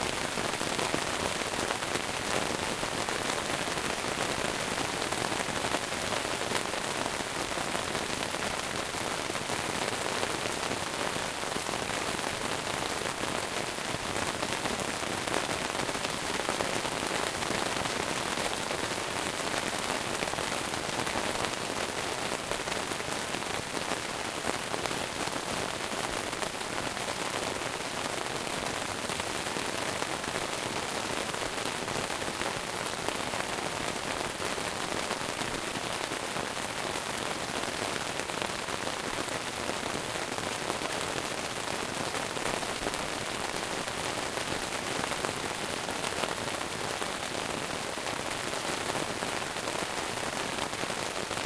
Inside a tent with rain (good for loop)
This was recorded during a thunder night in Skradin (Croatia) in September 2014. A friend and I slept in a tent, but I couldn't with the noise that made the rain. So, I decided to record it with my Zoom H4n...
rain, weather, camping, field-recording